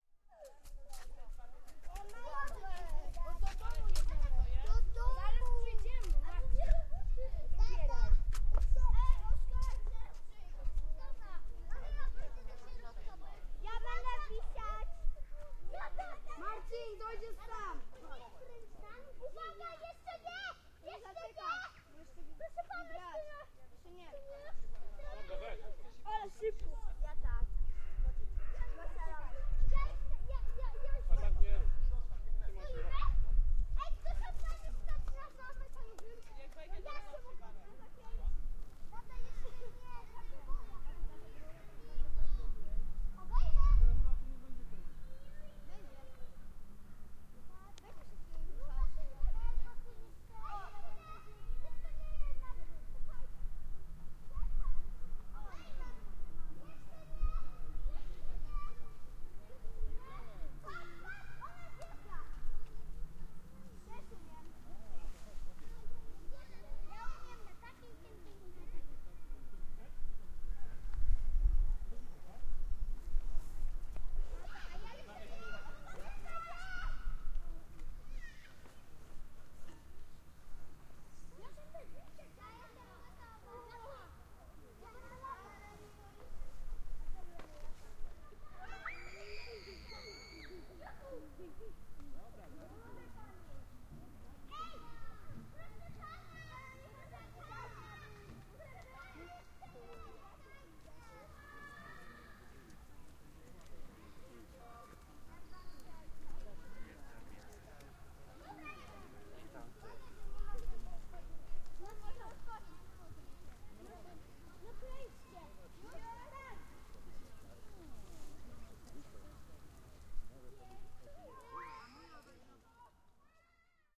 childrens playground recreation fun park pleasure ground
playground, pleasure